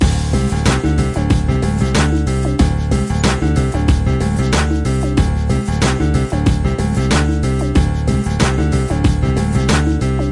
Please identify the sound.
Loop Nothing Can Stop Progress 11
A music loop to be used in fast paced games with tons of action for creating an adrenaline rush and somewhat adaptive musical experience.
war, videogames, loop, gamedev, Video-Game, music-loop, gaming, battle, videogame, indiedev, game, games, music, indiegamedev, victory, gamedeveloping